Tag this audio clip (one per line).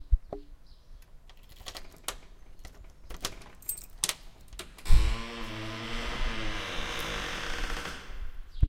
door
home
keys